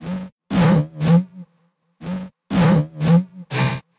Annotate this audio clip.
Computer beat Logic